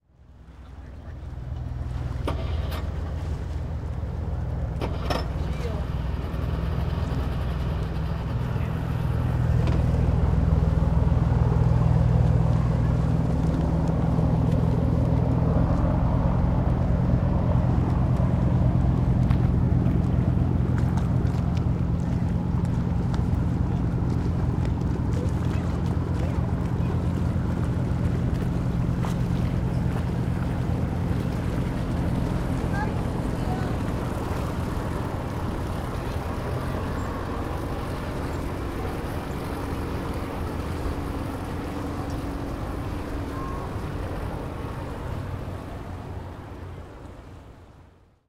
Motorboat Traffic
Several motorboats moving around in the harbor.
Recorded with a Sennheiser 416 into a Sound Devices 702 Recorder. Used a bass rolloff to remove rumble. Processed and edited in ProTools 10.
Recorded at Burton Chace Park in Marina Del Rey, CA.
Field-Recording, Waves, Harbor, Transportation, Motor, Marina, Ocean, Motorboat, Water, Travel, Boat